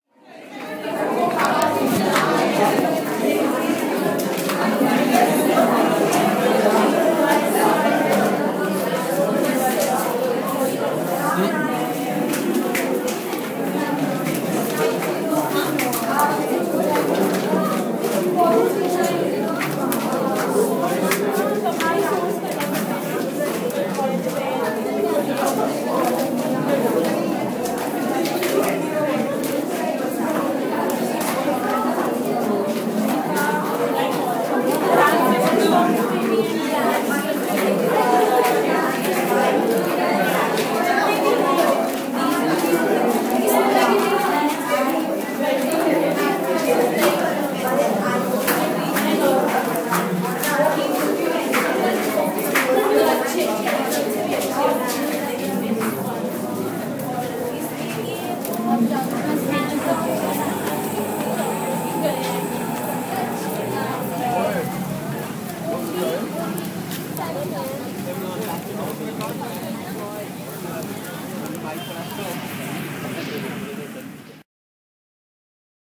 People Exiting and Dispersing from Movie Theatre
Recorded on an iPhone, the sound of people exiting and dispersing after I saw a movie in Mumbai, India.
field-recording, reverberation, theater